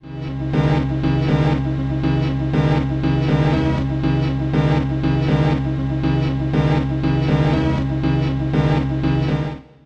bl lp03
120bpm loop made in Blip1.1